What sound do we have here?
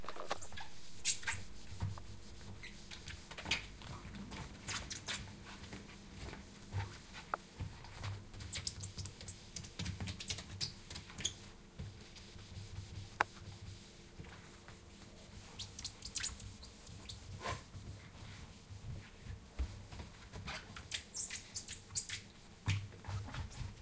cleaning the fridge